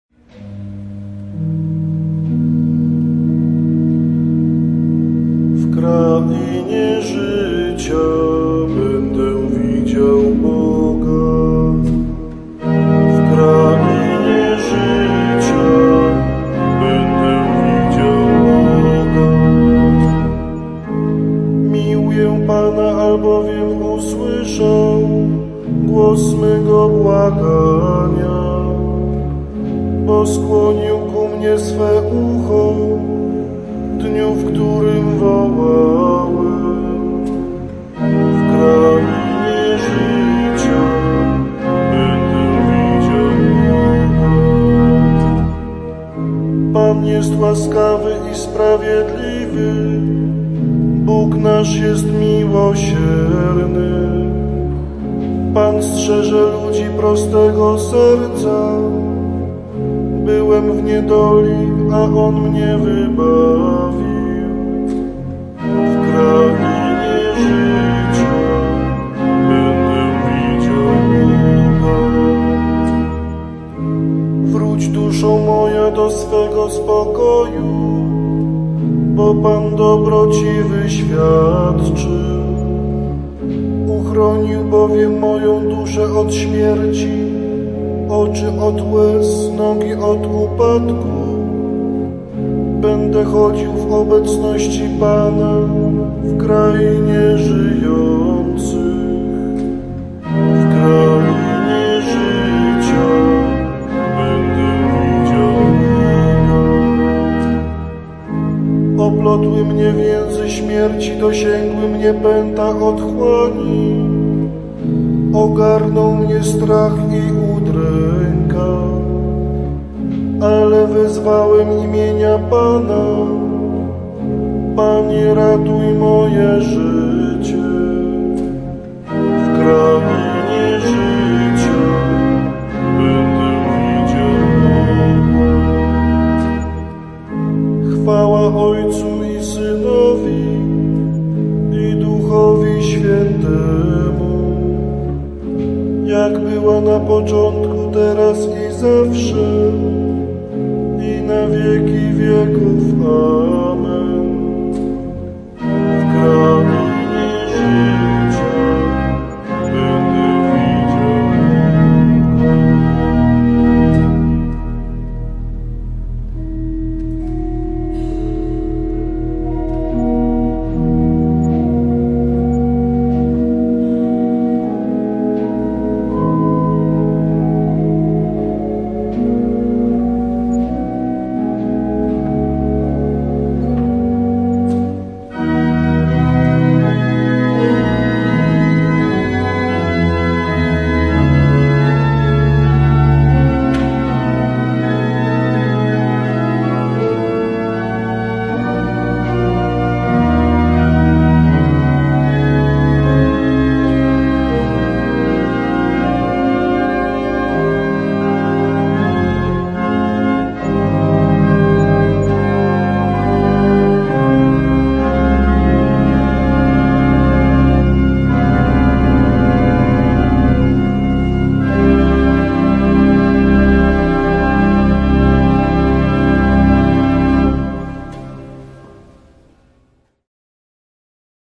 w krainie życia będę widział Boga
psalm, requiem, church, funeral, catholic